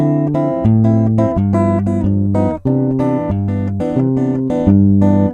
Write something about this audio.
II-V-I Bossa Nova Guitar based on C Major at 90bpm on electric guitar loaded with EMG SS + 89 plugged direct to audio interface straight on Ableton.